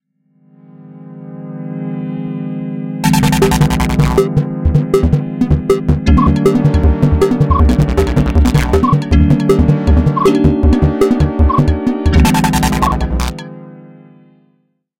a mix of hard and soft. yamaha dx7 chords over FM drums and synth
dx7, distorion, ableton, keys, yamaha, fast, digitopia-miniatures-competition, synth, operator, drum, fm